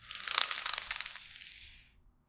Pulling open the blinds, recorded with a Zoom H4.